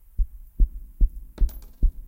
A giant, running.